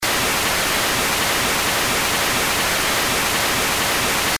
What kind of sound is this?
video distortion / malfunction
Frighten Video SFX
malfunction, video, effect